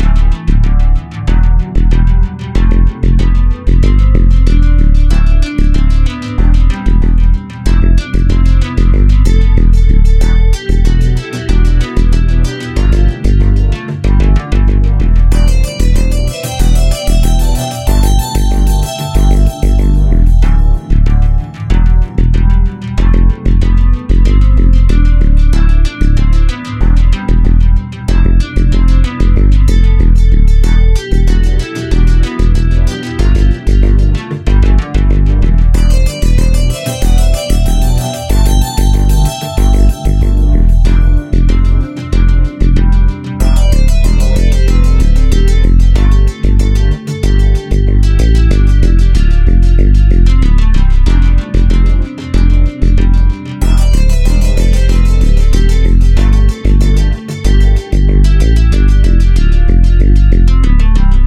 Heavy - Jazzy Jam at 94 BPM key of D minor.
Music, Minor, BPM, 04, Loop, D